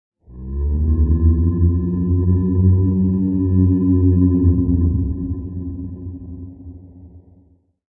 rnd moan14
Organic moan sound